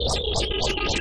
Created with coagula from original and manipulated bmp files. It's a helicopter!